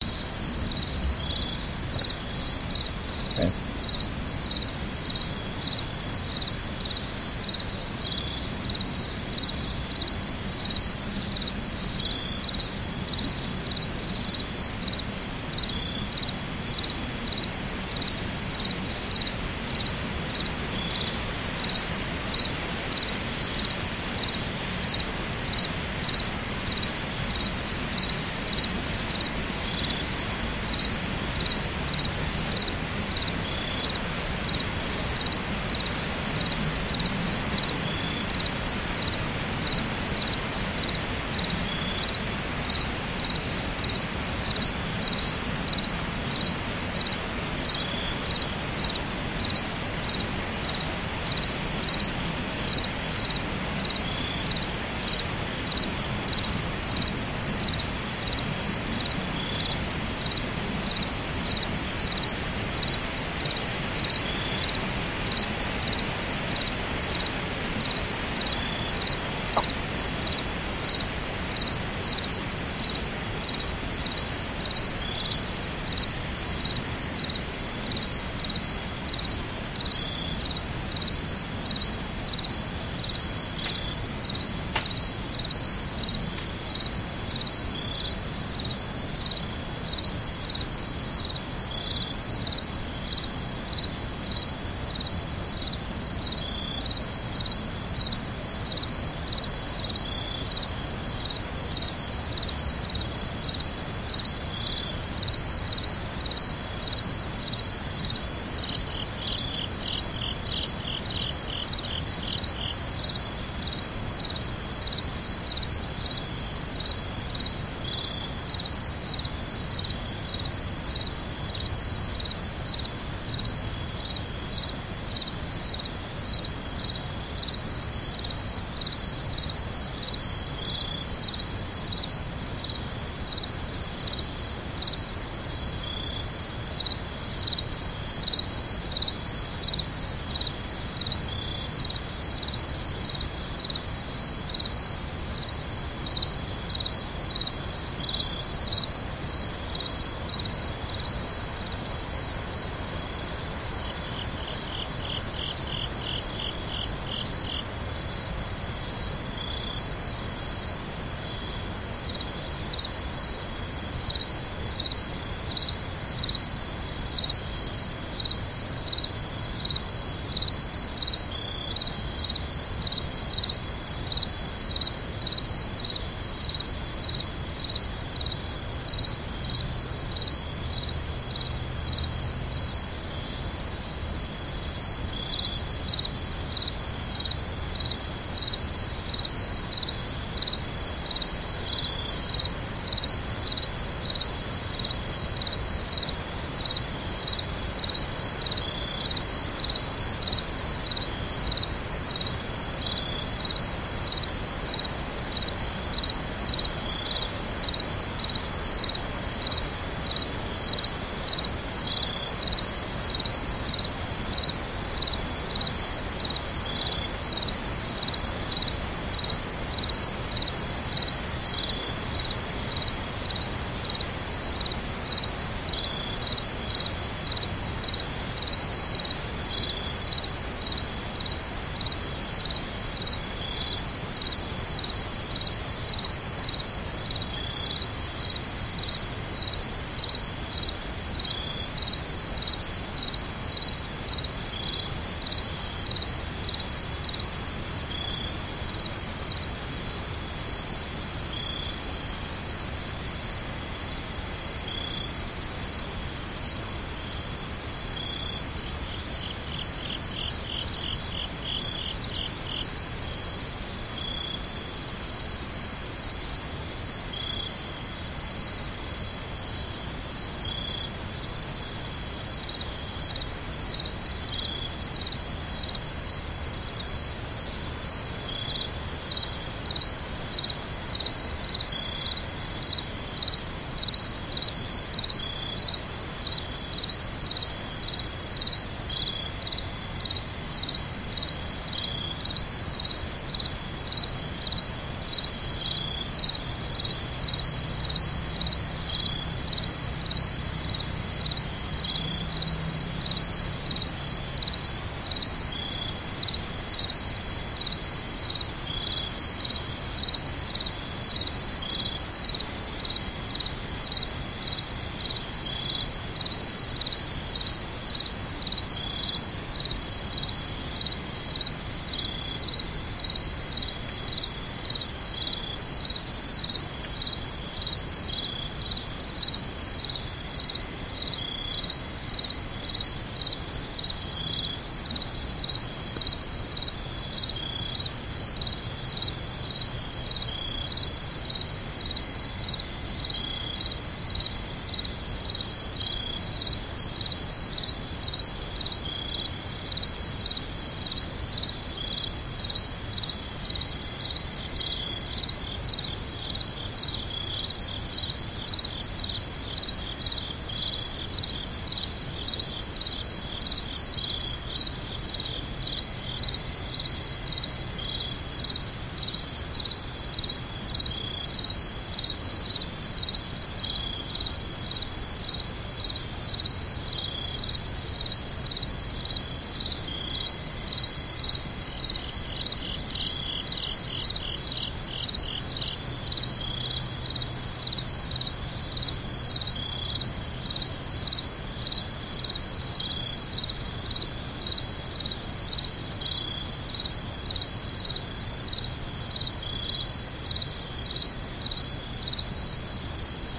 The sun comes out. Crickets and frogs.
Recorded on a cold, wet september day during a break in the rainstorm. As I made my way down the gravel road, the sun came out , warming and brightening the landscape. I passed by amber pastures where water droplets glistened on the autumn grass. Even the wind died down a bit.
I stopped to record across from a cow pasture. Frogs sang in the forest above me and crickets sang in the pasture. Occasionally, one of the cows in the distance would move, making soft noises of rustling grass.
This is a wide soundscape full of crickets and frogs, without too much wind noise - the most idyllic of the four recordings from this session.
Recording date: Sept 8, 2012, 4:14 PM.
unedited, nature, ambiance, crickets, west-virginia, frogs, field-recording